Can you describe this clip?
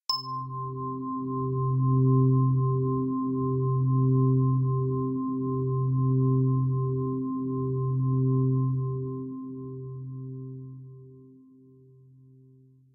A high pitched chime sound that slowly fades out. Recorded with Ableton Live.
ambient, ring, chime, ding